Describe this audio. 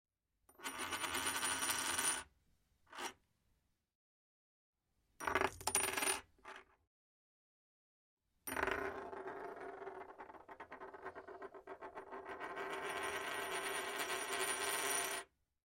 Medium coin spinning on table
(Recorded at studio with AT4033a)